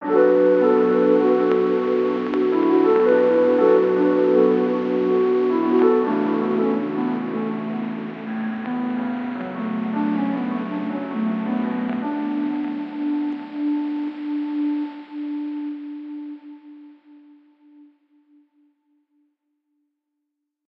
A piano with a lot of EQ and a synth with a lot of background vinyl. 80-bpm in 4/4.